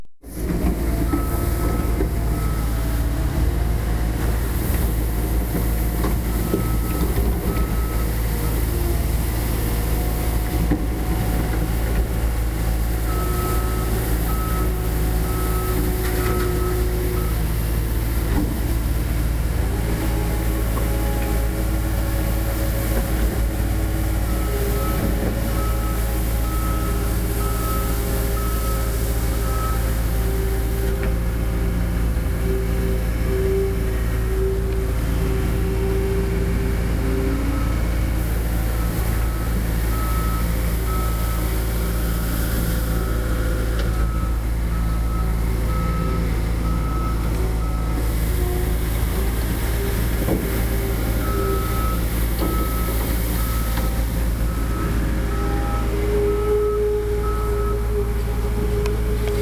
lackey070329 1202p bobcat sweeping
A small front-end loader with a sort of sweeper attached to the front, picking up debris after jackhammer work. In effect, a giant vacuum-cleaner.
construction, engine, machine, city, environment, road, tractor, urban